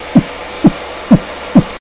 throat kick
made this sound with my throat
dare-19, kick, throat